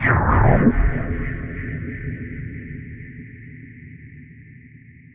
there is a long tune what i made it with absynth synthesiser and i cut it to detached sounds
space, ambient, reverb, noise, fx, sample, electronic, dark, horror, ambience, drone, deep, synth, digital, atmosphere, sound-effect, experimental